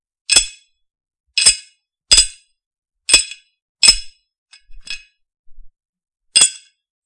Bolts into Iron Pipe Flange
Several clangs of dropping zinc bolts into a black iron pipe flange.
Screw, Bolts, Pipe, Flange, Nuts, Bolt, Nut